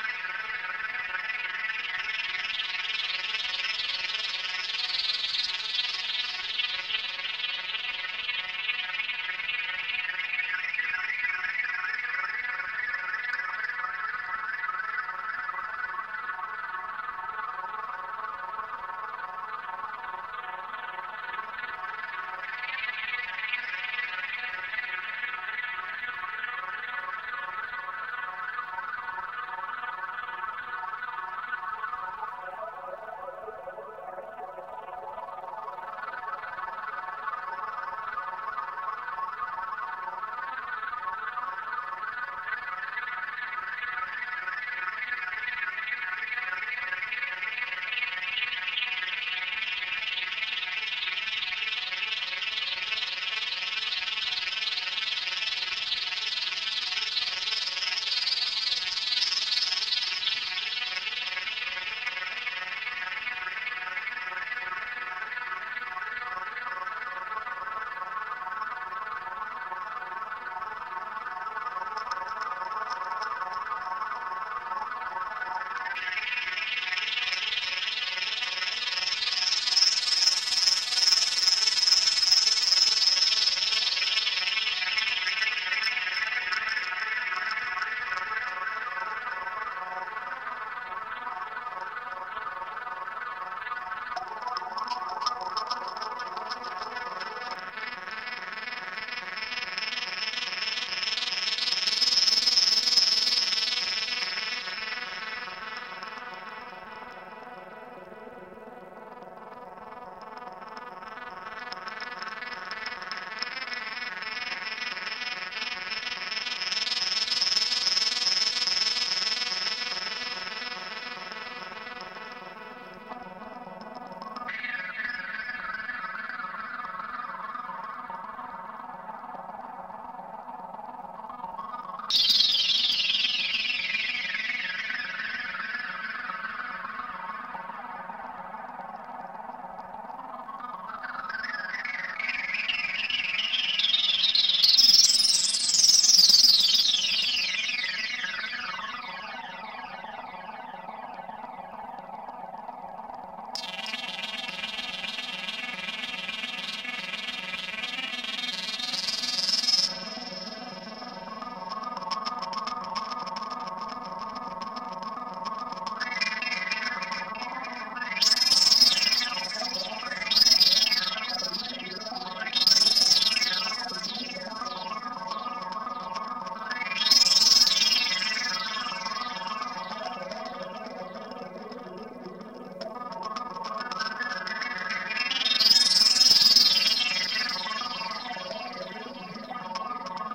sparkling synth-loop in the key of B----------------------------------------------------------------------------------------------------------------------------------------------------------------------------------------------------------------------------------------------